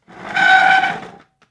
Chair-Dining Chair-Wooden-Dragged-04
This is the sound of an old wooden dining room chair being dragged along a concrete floor. It could make for a good base as a monster roar.
Chair, Concrete, Drag, Dragged, Pull, Pulled, Roar, Wooden